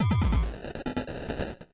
A nice short loop crushed down to 8bit rage-quality
rage, crush, drum, loop, 8bit